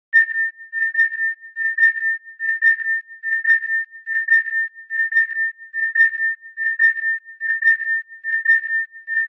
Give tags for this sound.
alarm
alert
aliens
beep
beeping
bleep
blip
caution
cinematic
computer
detector
effect
effects
fantastic
fiction
film
fx
movie
radar
science
sci-fi
score
sfx
signal
sound
sound-design
sounddesign
tracker
warning

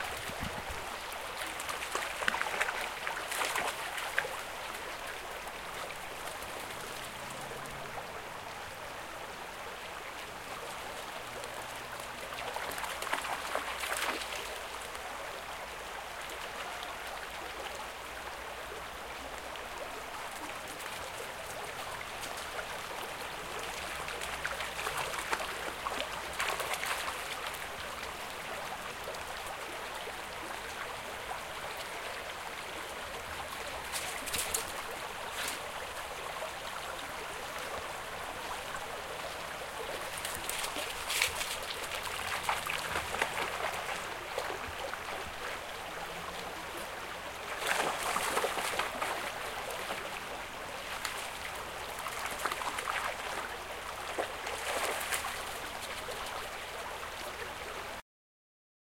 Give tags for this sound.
Bubble
Bubbles
Creek
Dog-Playing
Field-Recording
Foley
Splash
Stream
Water

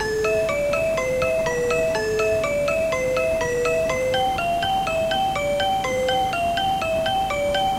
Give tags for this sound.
cassette
Loop
bass
chrome
synth
Amiga500
Amiga
collab-2
tape
Sony

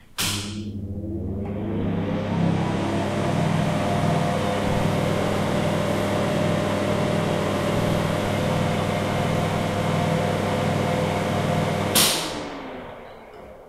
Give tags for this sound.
machine
machinery
factory

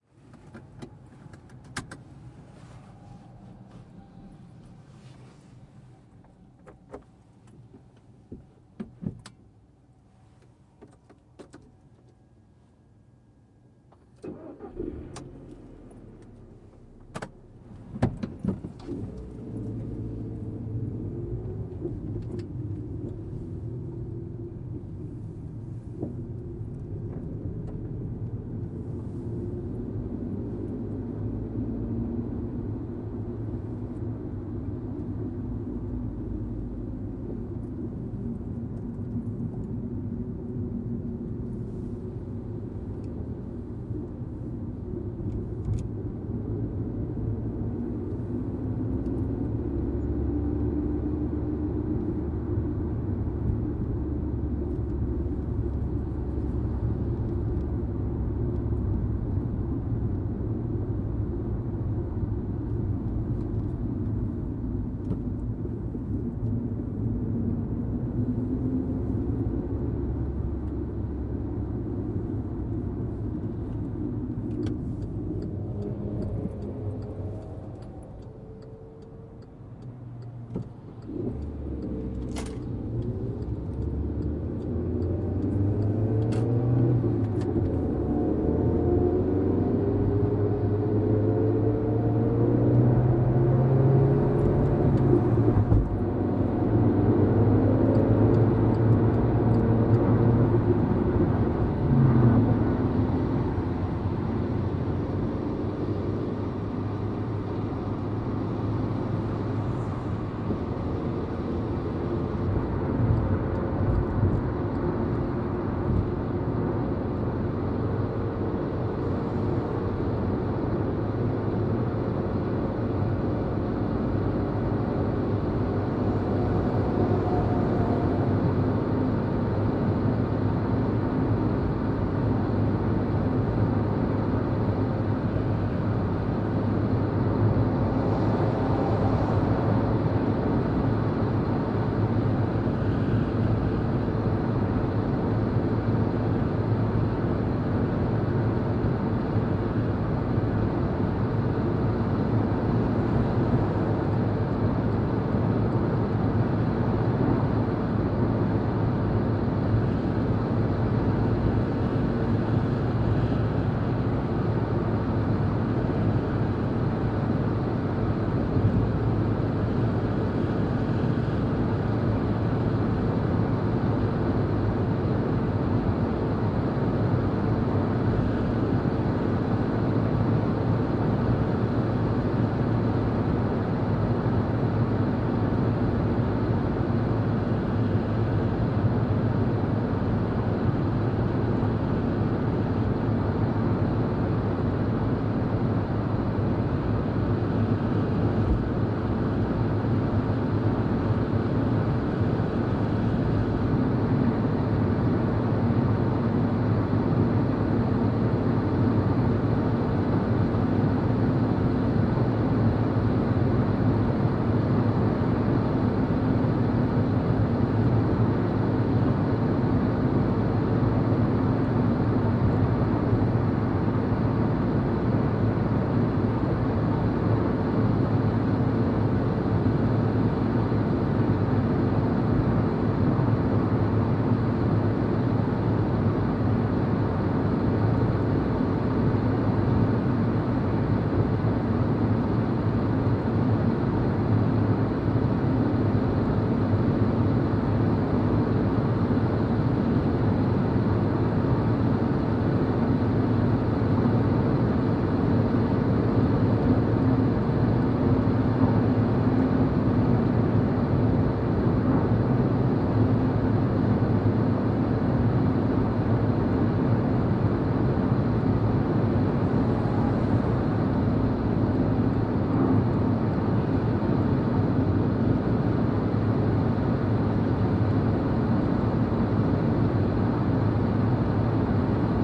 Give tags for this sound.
road
highway
driving
traffic
field-recording
car
cars

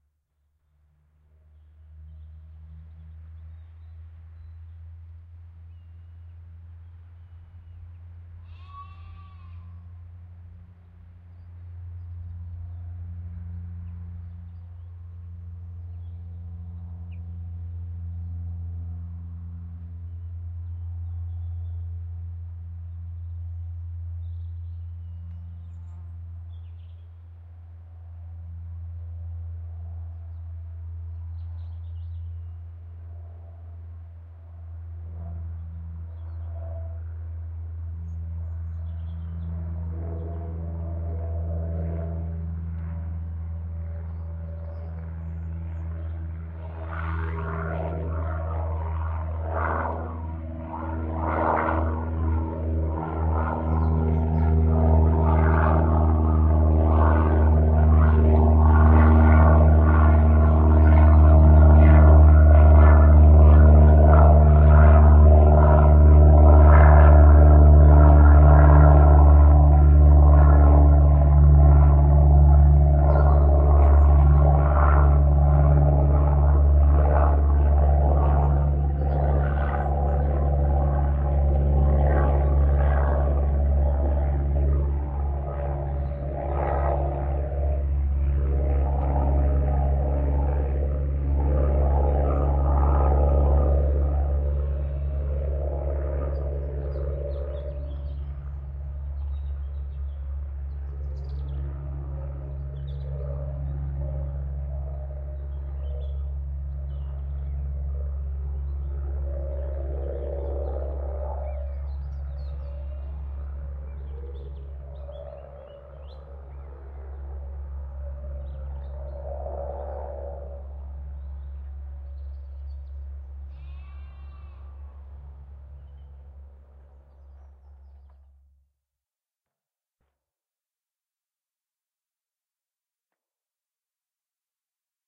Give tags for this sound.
aeroplane
plane
propeller
aircraft
prop
airplane
single-engined

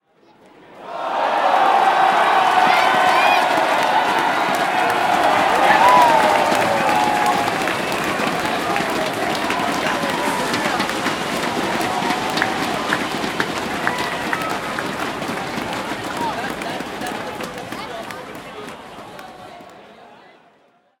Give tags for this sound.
Howls
Crowd
Roars

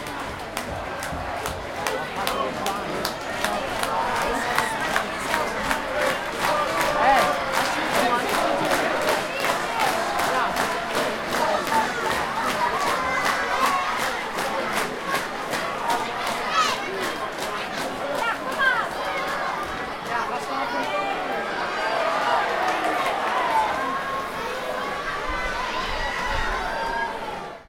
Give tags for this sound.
crowd
field-recording
football
match
public
soccer
stadium

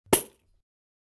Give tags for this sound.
Drums
Percussion
Pandeiro

